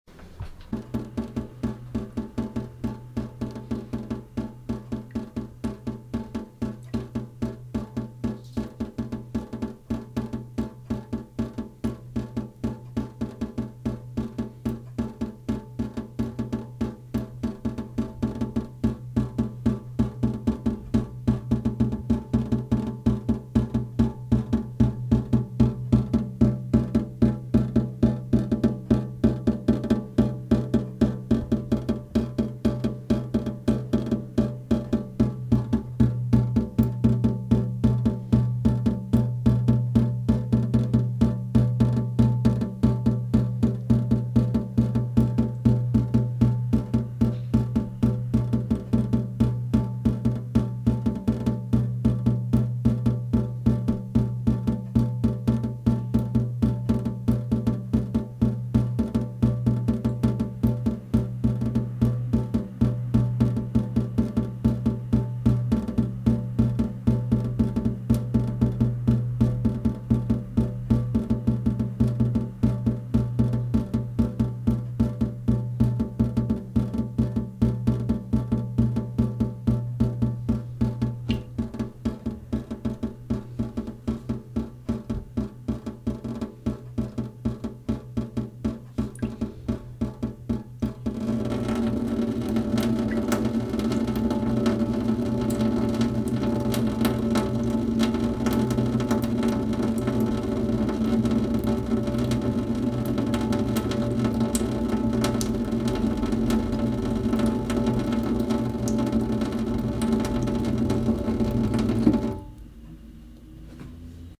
Water drops in metal sink
Recording of water drops in a metal sink. The timbre changes from moving the mic around.
drop, metal, sink, Water